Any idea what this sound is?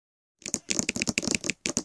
Heavy rain falling onto a window.
rain, rainy, storm, rain-patter